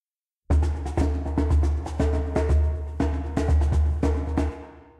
LoFi Djembe Grooves I made, enjoy for whatever. Just send me a link to what project you use them for thanks.
LoFi, Djembe